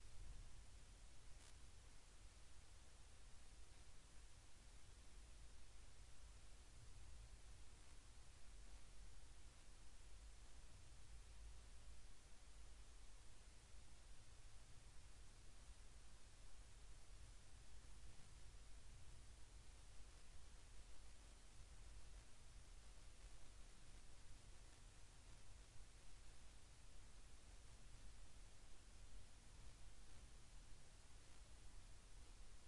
66 Sonido Piso 2
Cuando hay ruido de piso
de, piso, ruido